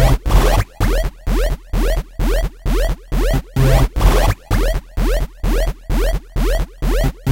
Extremely irritating electro house stabs made with Analog in Ableton
stabs, house, dance